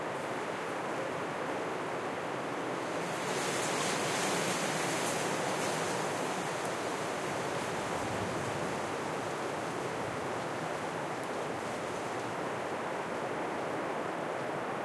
a wind gust hits a tree's branches. Or rather I should say leaves, as the tree was a palm. Sennheiser MKH60 + MKH30 (with Rycote windjammer)into Shure FP24 preamp, Edirol R09 recorder
field-recording, gust, nature, storm, tree, wind